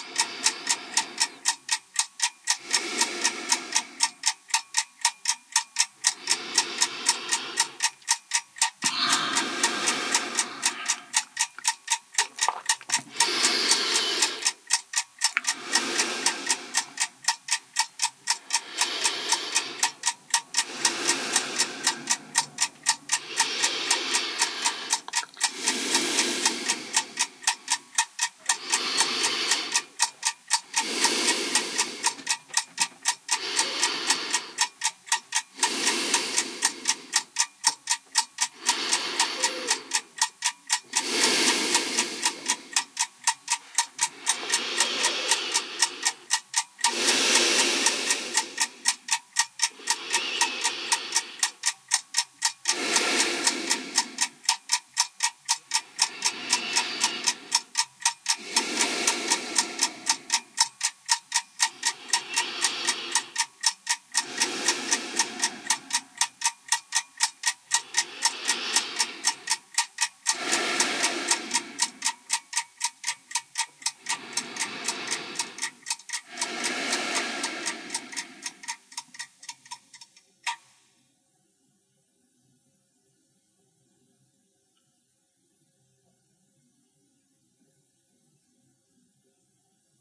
clock ticking + close breathing. Sennheiser MKH 60 + MKH30 into Shure FP24 preamp, Edirol R09 recorder